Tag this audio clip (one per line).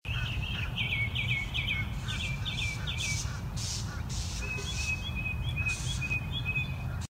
bird
birds
birds-chirping
birdsong
chirping
field-recording
mocking-bird
mocking-birds
mockingbirds
nature
nature-background
spring